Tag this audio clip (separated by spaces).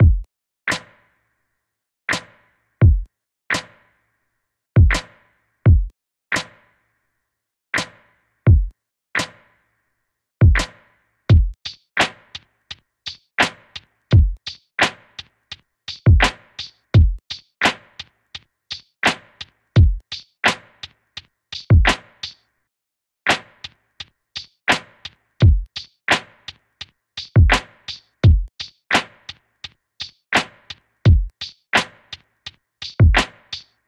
85-bpm beat beats bpm chill chill-drum Drum drum-line drum-loop drums for-producer hiphop lo-fi lofi lofi-drum lofi-drum-loop lofi-hiphop lofi-loop loop loops mellow music rap-beat sample-pack